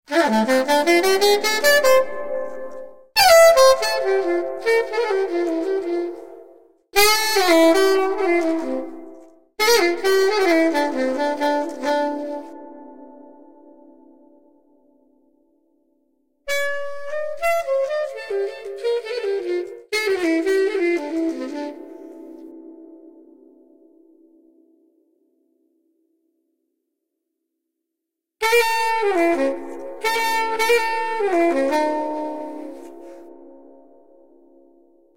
soprano sax solo
Well, for the benefit of Mr Kite, here is a sample clip of audio of myself playing the soprano sax. I was a semi-pro player for many years and always kept an archive of audio clips. Enjoy.
jazz, funk, sax, sample, soprano, saxophone